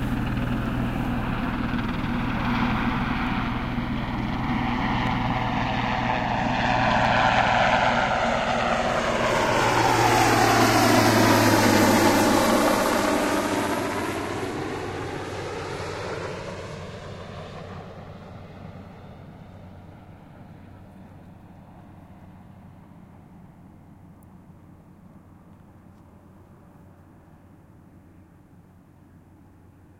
a very large helicopter overheading a very small airport at Narsarsuaq, Greenland